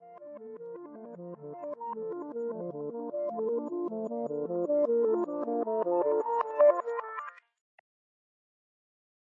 FX arpeggio reverted
FX I designed reversing the sound of an arpeggiated digital synthesizer in Ableton Live.
I use it in one of my downtempo psybient/dub track.
reversed, FX